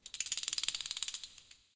DRUMS CASTANETS SHAKE 2
castanets, drum, percussion